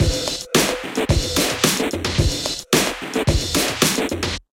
Pop/Hip Hop/Big Beat style drum beat made in FL Studio.
110, Drum-Loop, Big-beat